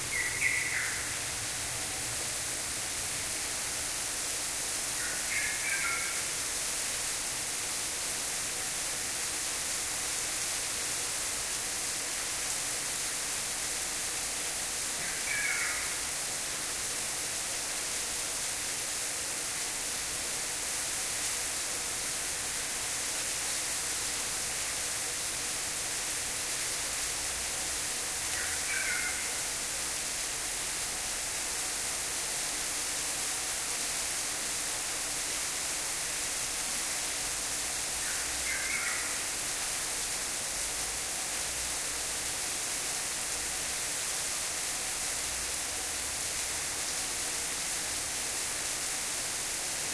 Song from a Yellow-vented Bulbul. Lots of noise from a waterfall, and some echo. Recorded with an Edirol R-09HR.